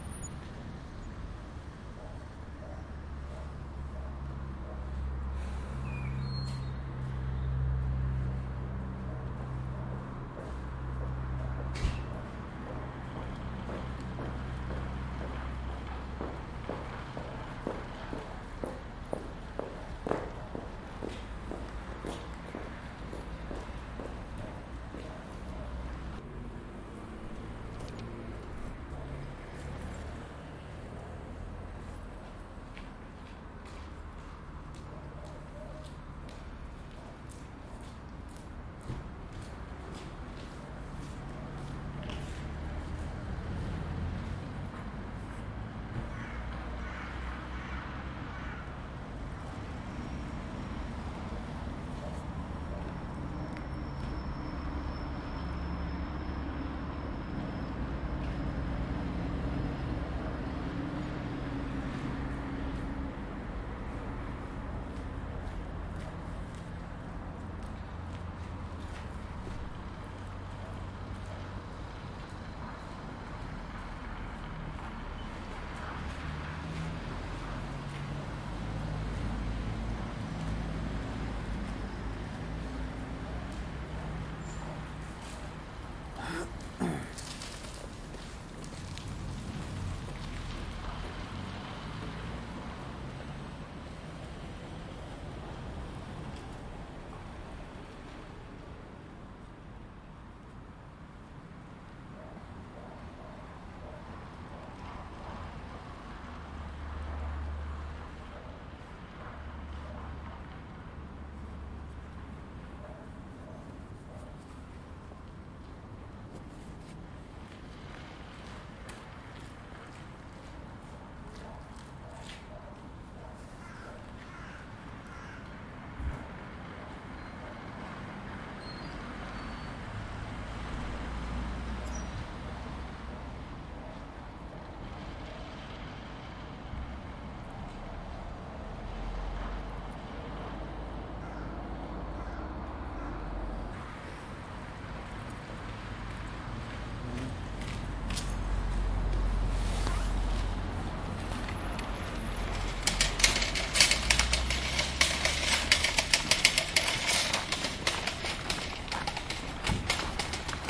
shop exterior01
Shop exterior - ambience,recorded with zoom h2n, edited with audacity place: Riihimaki - Finland date: year 2013
ambience, ext, field-recording, shop